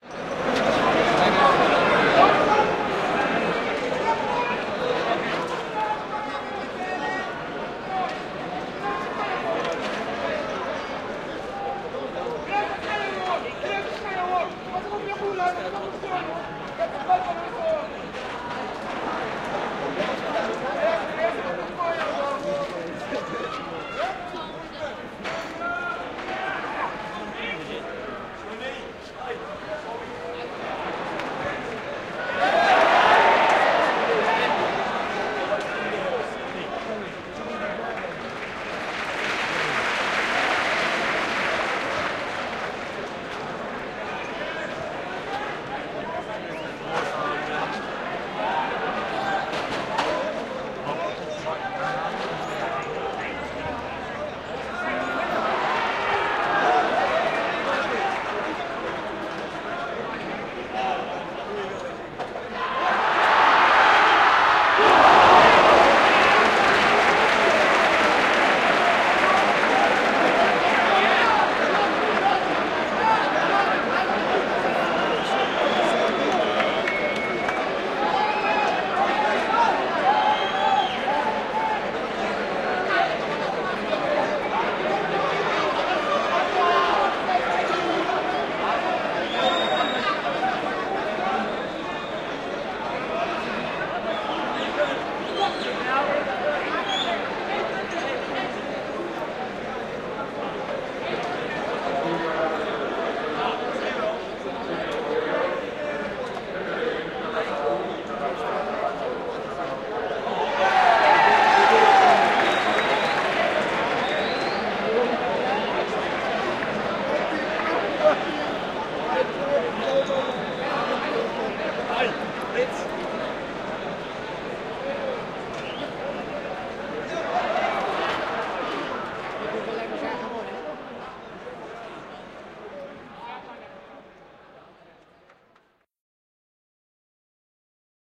I'm in the Olympic Stadium in Amsterdam, amongst Romanian supporters yelling encouragement to their soccerteam. You can hear the ball getting kicked. There is a near-goal (ooohhhh!) and an unintelligible announcement over the tannoys.